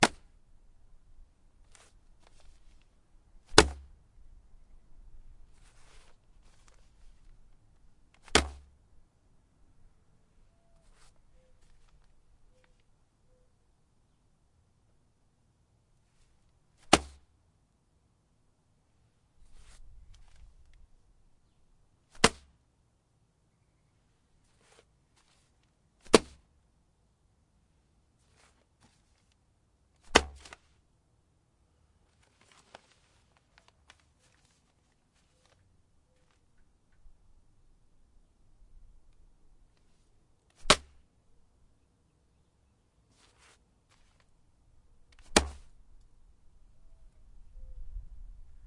newspapers small hard
forcefully throwing a small package of newspapers onto a porch
small, newspaper, toss